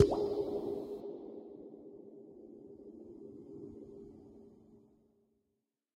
Deep Drip Hit
a drip in a cave
cave, drip, drop, reverb